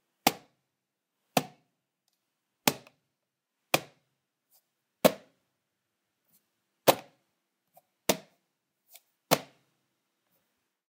Alarm clock, hit snooze button
Hitting the snooze button on a plastic digital alarm clock
radio, snooze